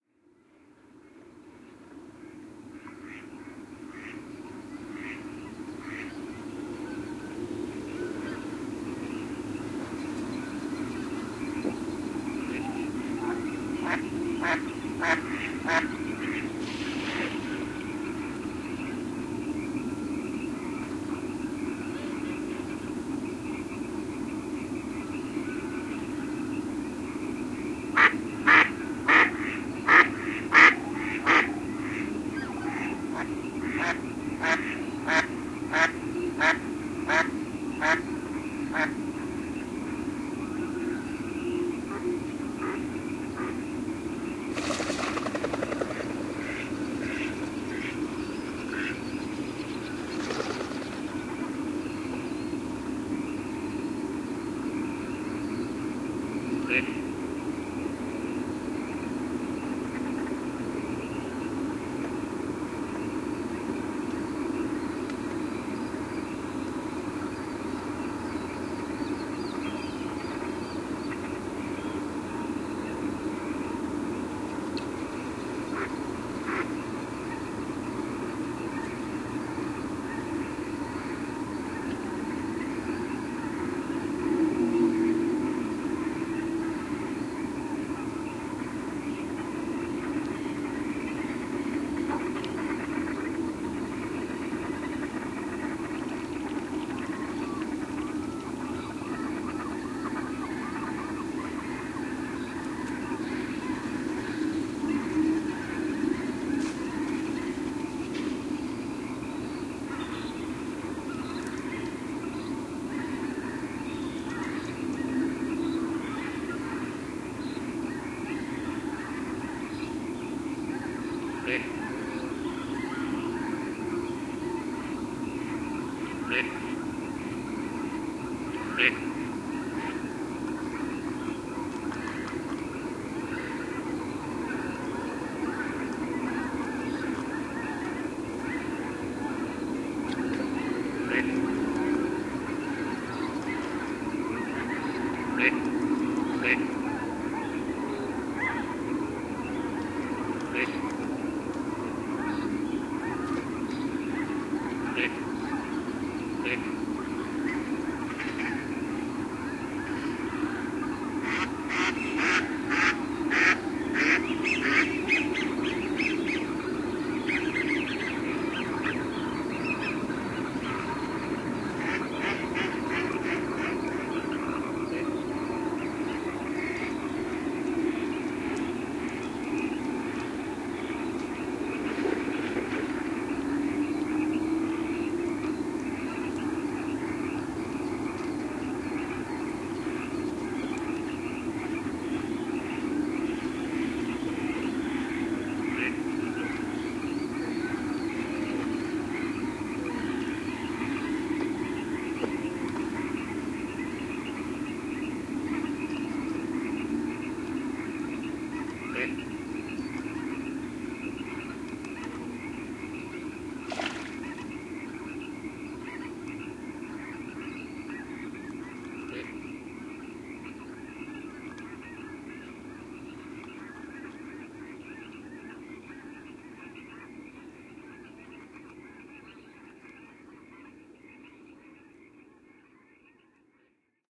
Recorded January 23rd, 2011, just after sunset.